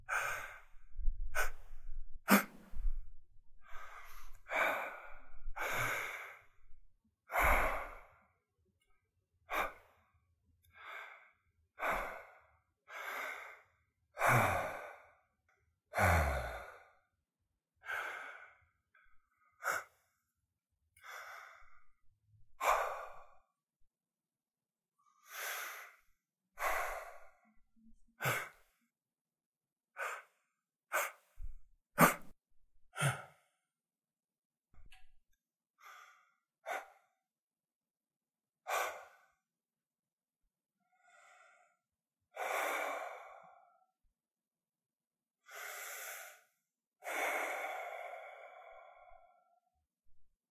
A series of breathing sounds from the mouth. Gasping, sharp inhales, sighing.
gasp, sigh, inhale, sighing, breath, breathing